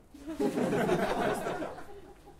group laugh2
A group of people laughing. These are people from my company, who listen story about one of them.
Recorded 2012-09-28.
AB-stereo
human, laugh, people, humor